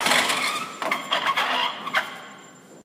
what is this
Metal door opening with a creepy, creaky sound.
mechanical, door, creak